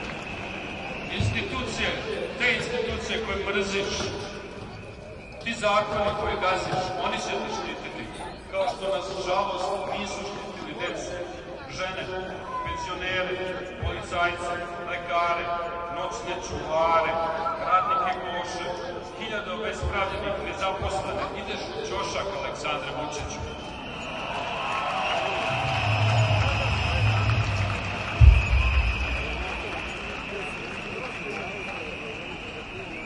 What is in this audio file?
Serbia Political Rally Speech 2017
Short snippet of political rally speech of Sasa Jankovic in Belgrade. Includes crowd blowing whistles, and stage drums.